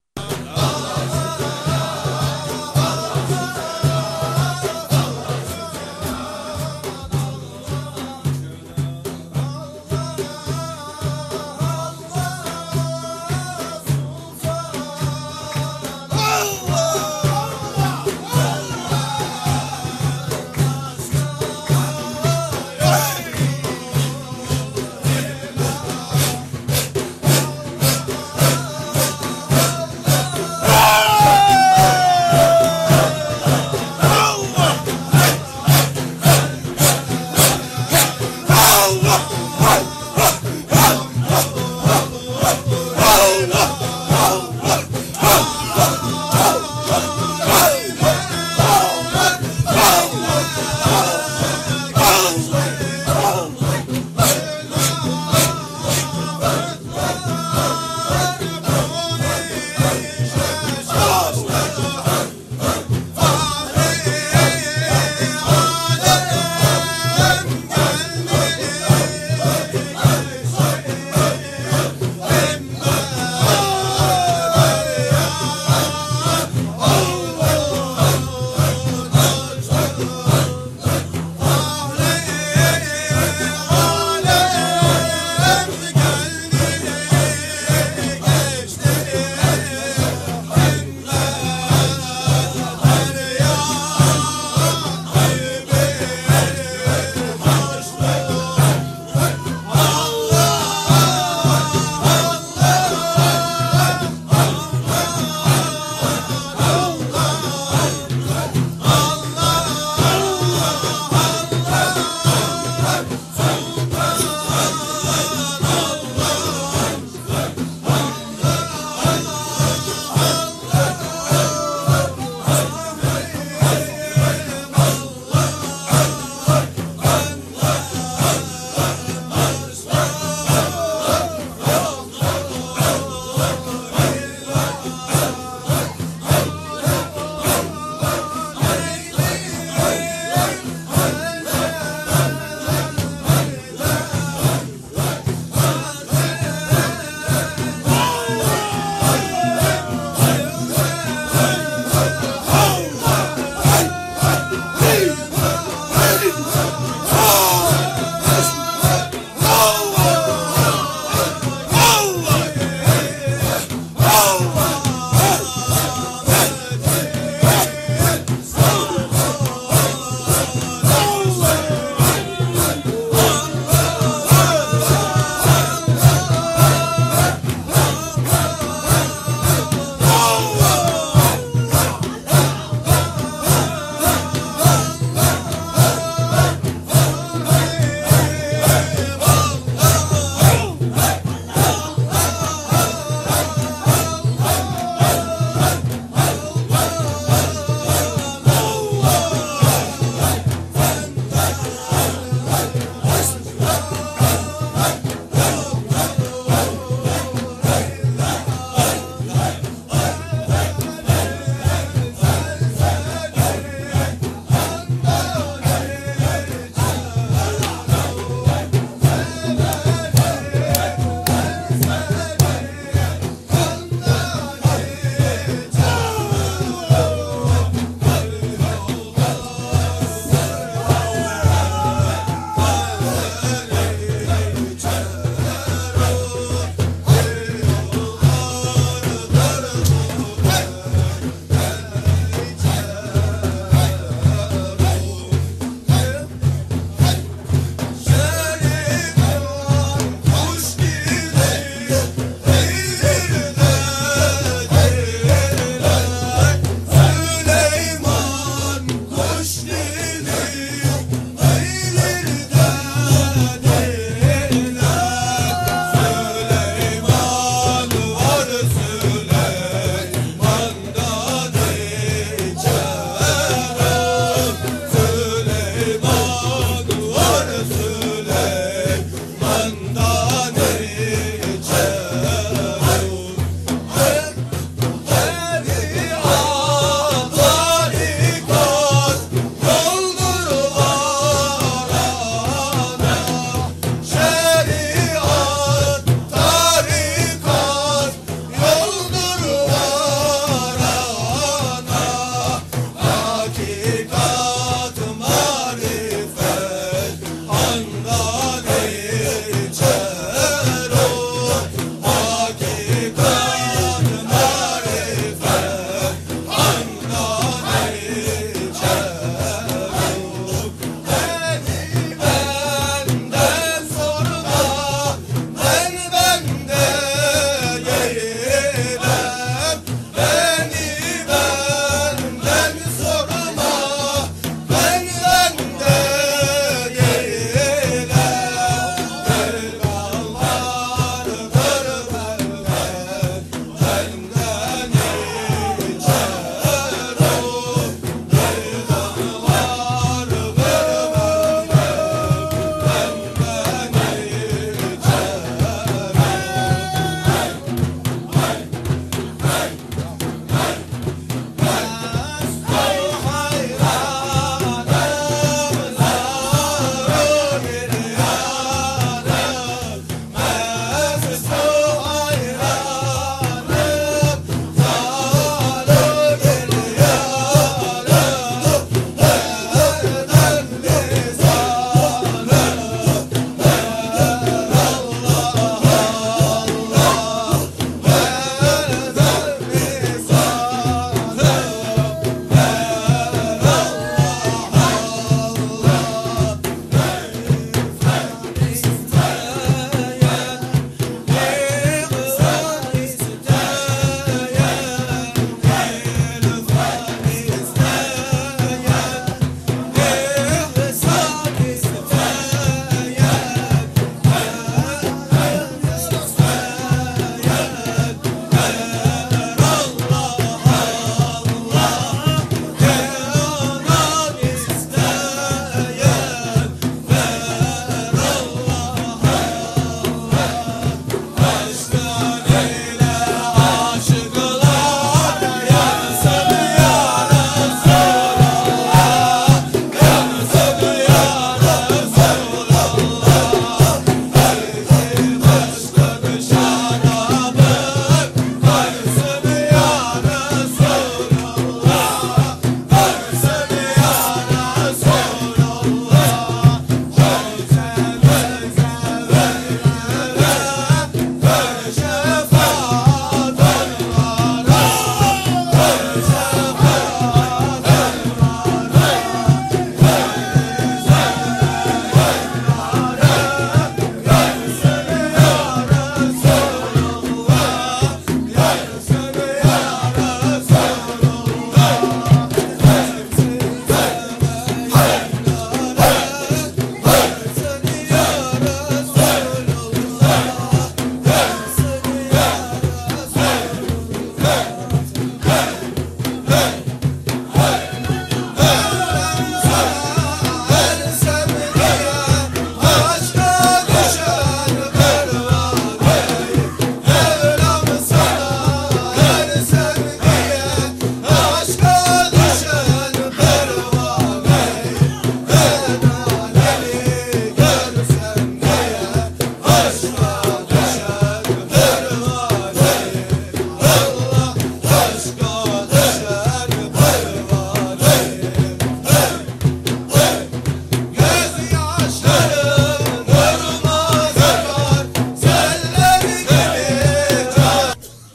Islamic Zikr sufi Nakshibandi
Recoding made in Turkey of a Sufi religious ceremony.
nakshibandi haqqani dergah sufis made zikr, referred to Allah
Allah, islamic, Nakshibandi, referred, song, sufi